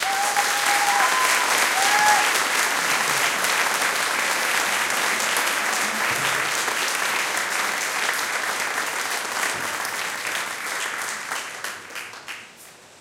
Theatre audience applauding after a song